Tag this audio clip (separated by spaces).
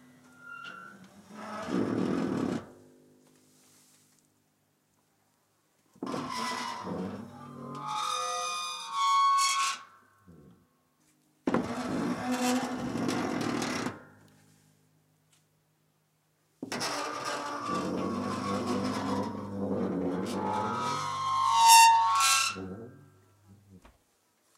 creak squeak door dishwasher